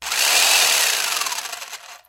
Jigsaw Rev 02
electric, industrial, jig, jigsaw, machine, motor, rev, saw, tool